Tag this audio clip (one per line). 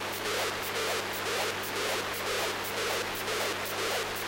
Mute-Synth-2
Mute-Synth-II
noise
rhythm
rhythmic
seamless-loop